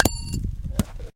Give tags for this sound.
hit
metal
wood

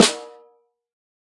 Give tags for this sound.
drum
velocity
snare
1-shot
multisample

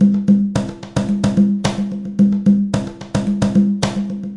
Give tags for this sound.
110bpm
drums

